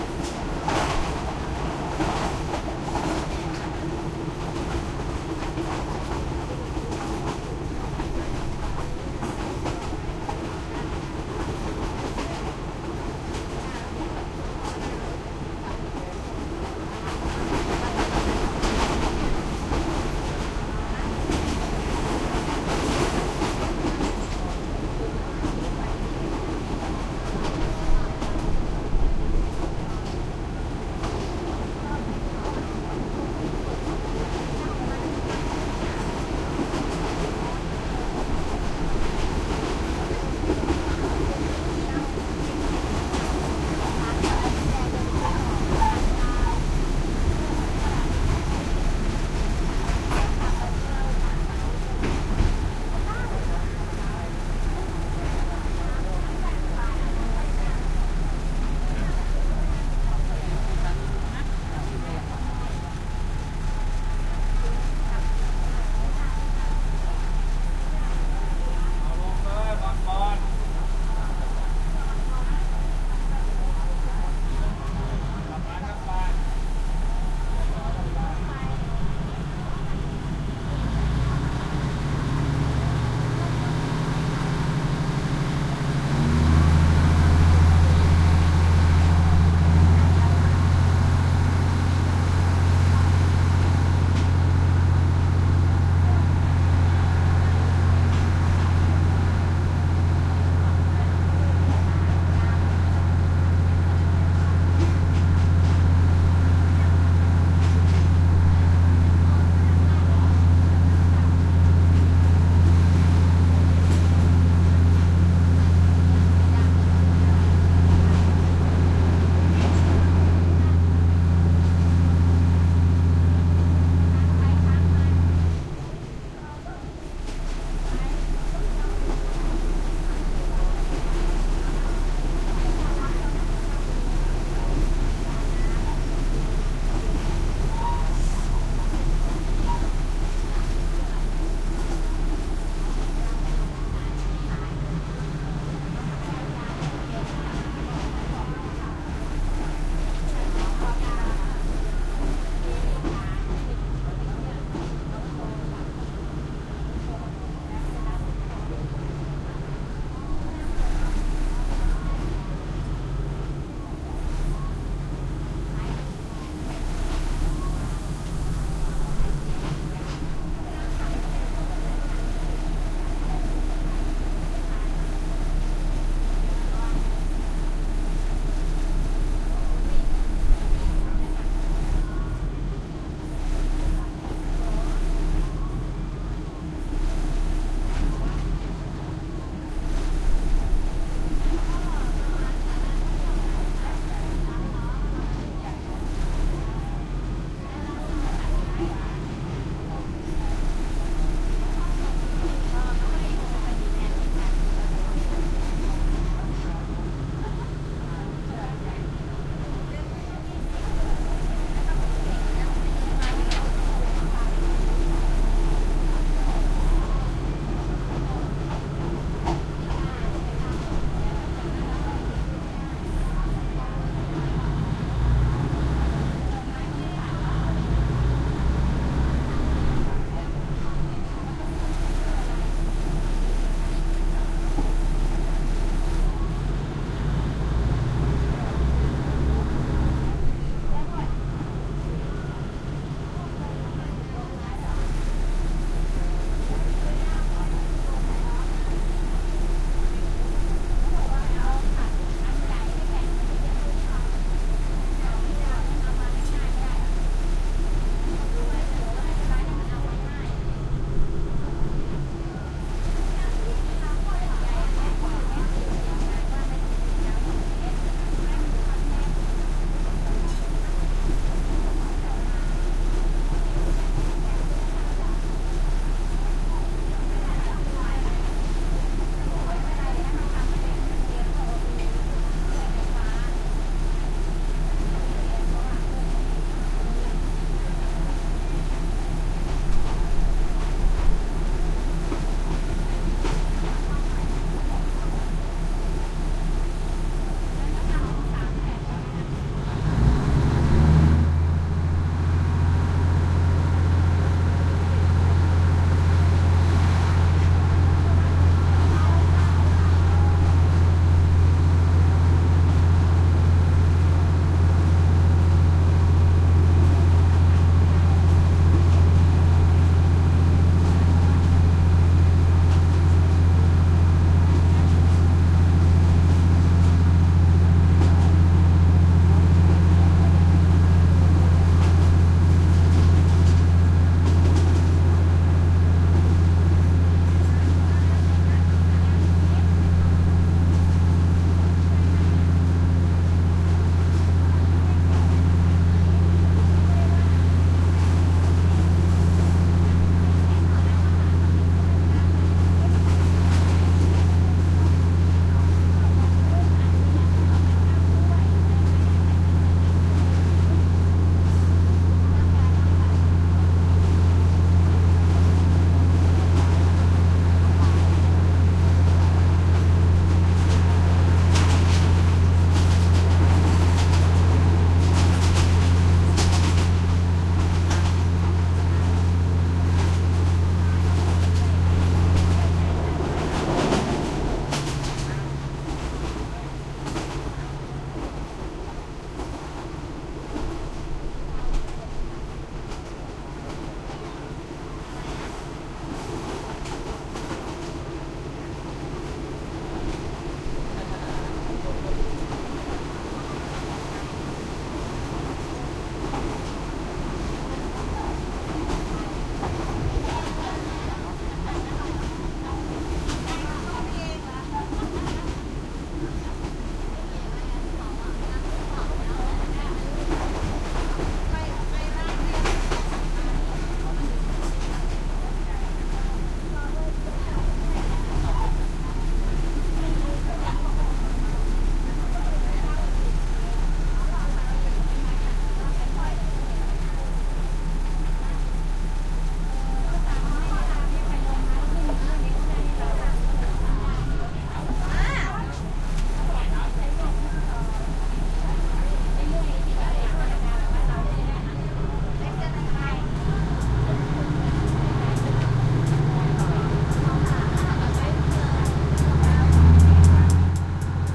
Thailand passenger commuter train open air on board thai chatter walla start stop travelling various, facing door for balanced track movement and loud engine